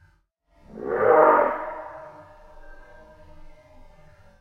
dinosaur1 reduced
Cry of a flying grey heron (see my recording dinosaur1) , with noise reduction and 75% delay. Really scaring!
heron, slowed, grey-heron, voice, delayed, dinosaur, bird, decelerated